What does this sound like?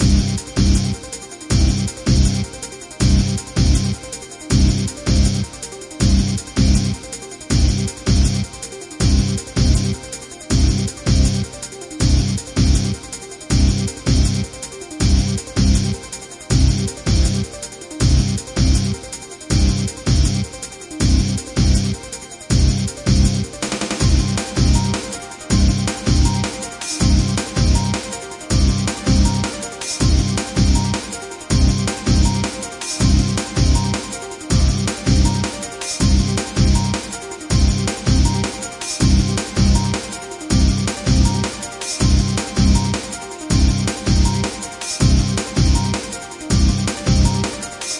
Guitar synth loop. Loop was created by me with nothing but sequenced instruments within Logic Pro X.